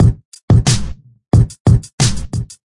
90 Atomik standard drums 03
fresh bangin drums-good for lofi hiphop
electro, sound